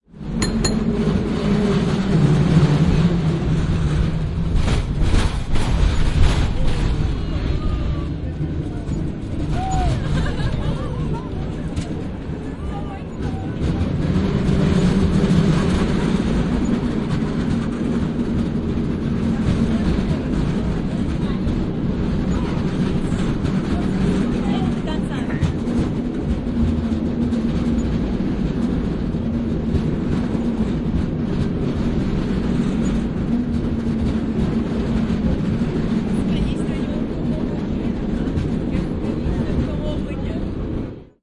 SF Cable car (SFMT) Bell rings, moving. January 2019
San-Francisco cable car bells ring, the car moves fast. SFMT
SFMT, bells, San-Francisco, cable-car